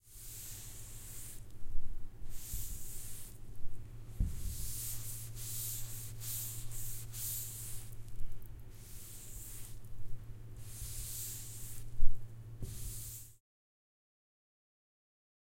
washing blackboard
Czech, Pansk, Panska, CZ